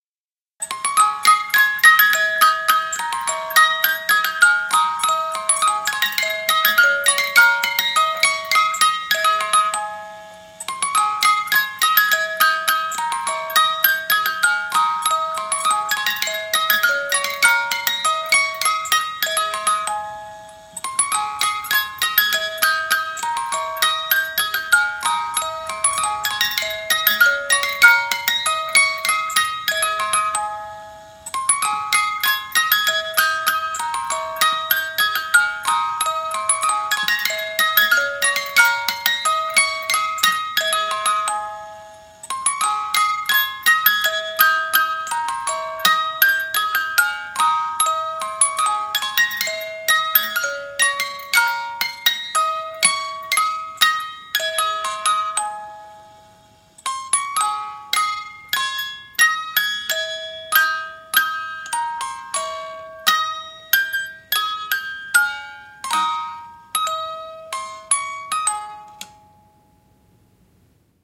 Wind Up Toy
carrousel, childrens-toy, merry-go-round, play, toy, up, wind, wind-up-toy